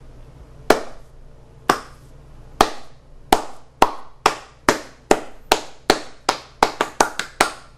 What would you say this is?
everyone loves the slow clap.